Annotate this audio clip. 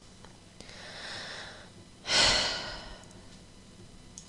Sigh 1 Female
A young woman sighing, possibly in frustration, exasperation, boredom, anger, etc.
speech, voice, reaction, vocal, sigh, breath, female, human, breathe, woman, girl